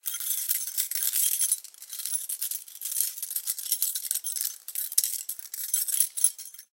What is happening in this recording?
Metal Jingling Slightly Noisey 1
metal, textural, jingling, metallic